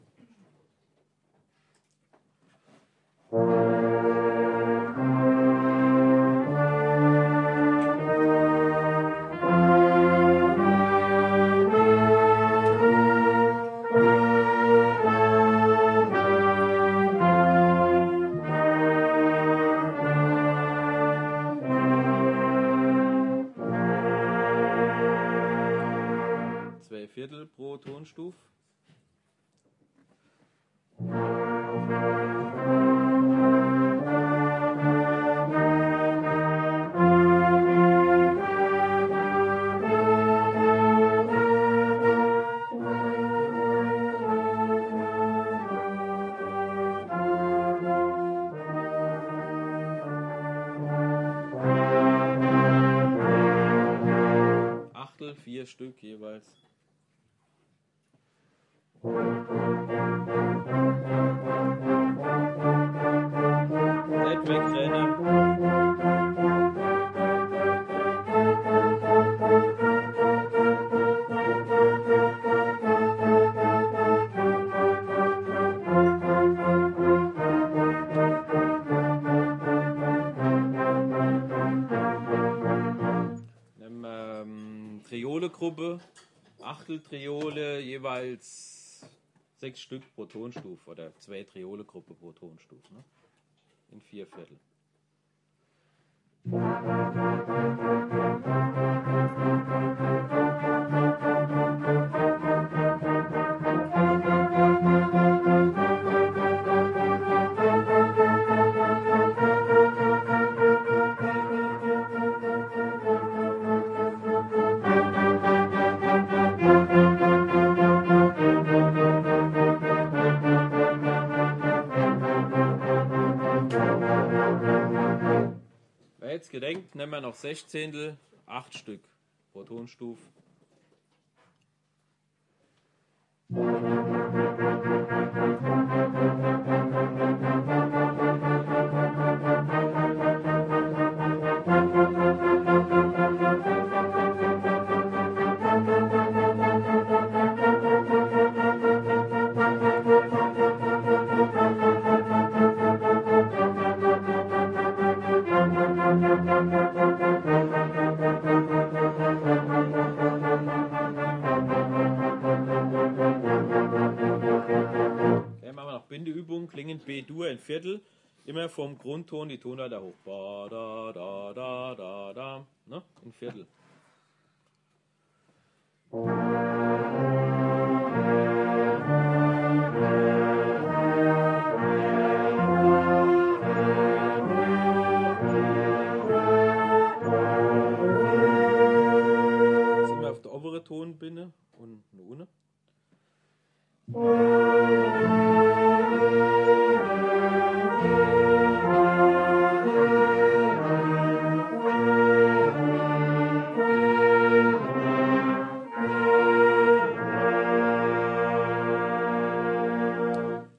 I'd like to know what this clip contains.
"Brass Band Warm up" just before rehearsal with the "Mackenbach Musikverein"
Recording: Tascam HD-P2 and BEYERDYNAMIC MCE82;
field-recording, brass-band-warm-up, rehearsal